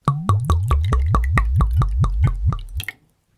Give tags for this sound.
pour; liquid; 16bit; alcohol; booze; pouring; liquor; bottle

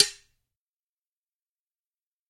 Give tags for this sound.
field-recording; impact

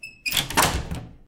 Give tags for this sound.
door; close; slam; house; home; wood; indoor; squeak

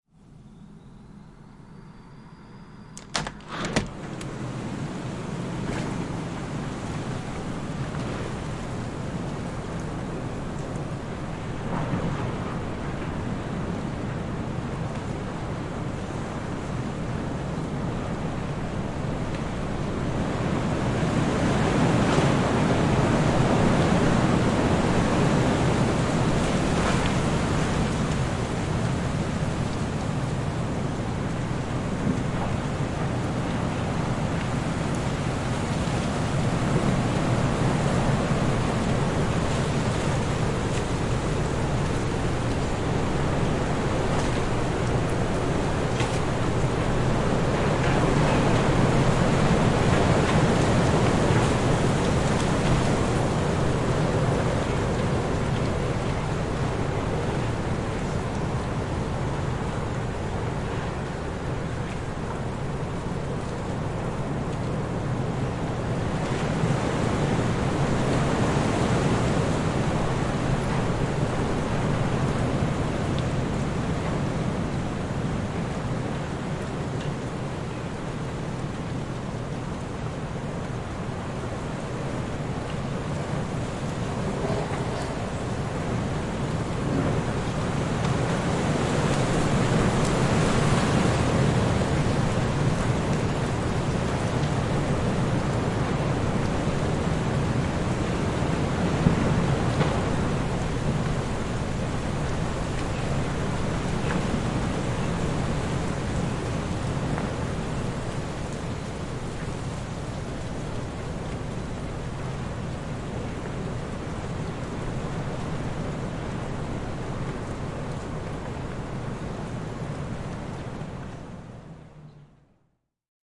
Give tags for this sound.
bleak; calm; cold; February; field-recording; freezing; gusts; heavy-winds; howling; nature; soothing; storm; weather; wind; windstorm; winter

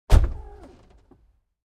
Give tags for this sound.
sports; engine; ignition; vehicle; automobile; car

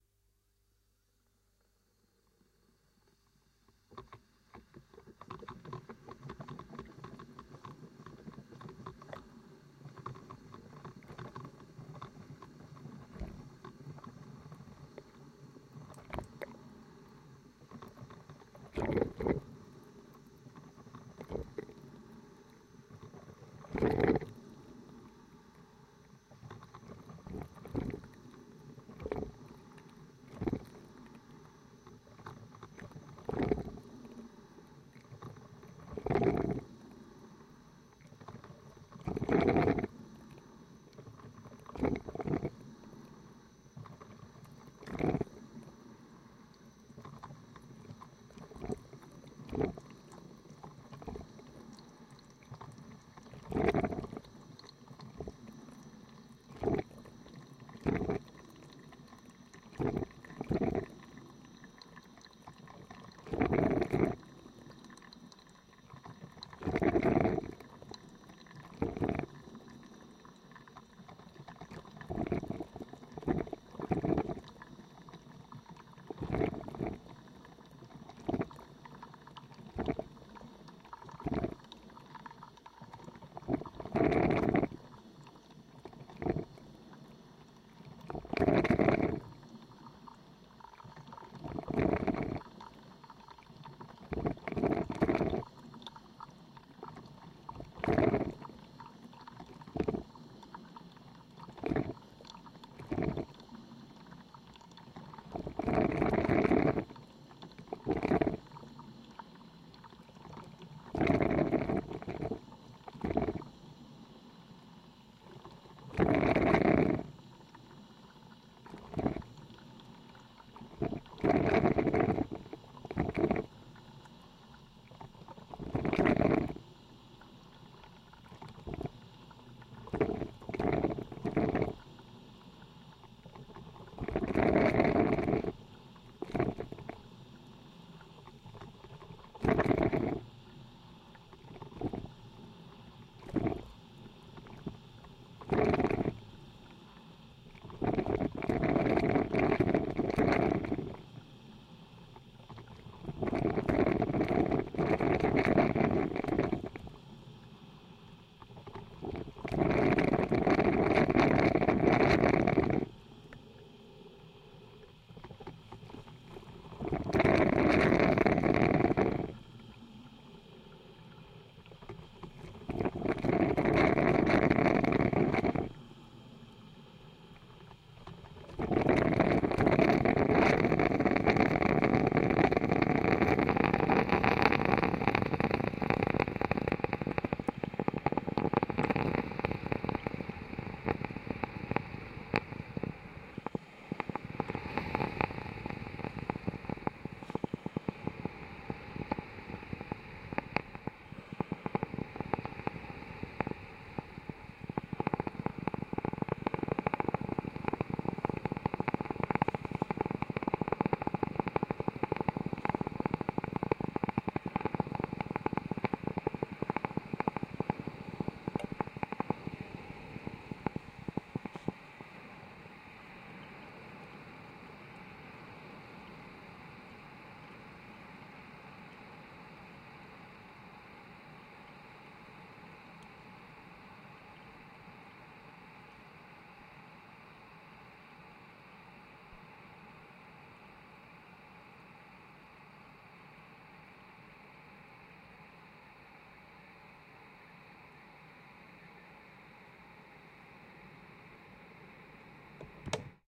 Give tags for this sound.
filter-coffee; machine; brewing; coffee-brewing; coffee; coffee-machine; making-coffee

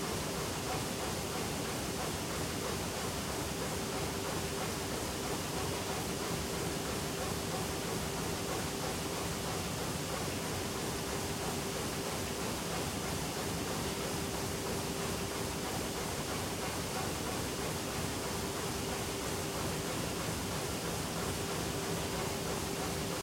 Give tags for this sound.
engine; factory; industrial; loop; machine; machinery; mechanical; motor; robot

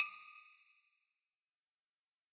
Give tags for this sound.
bell; ceramic; chime; drum; groovy; metal; percussion; percussive; rhythm

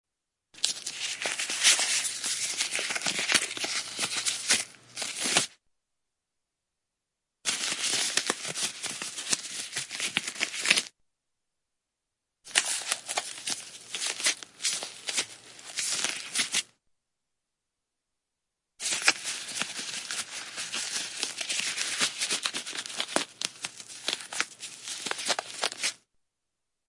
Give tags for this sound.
acrylic; cloth; clothes; clothing; cotton; dressing; foley; handle; jacket; movement; Nylon; rustle

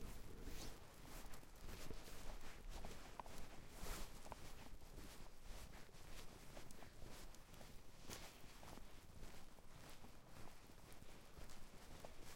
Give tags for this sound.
crunch; footsteps; POV; quad; snow; walking

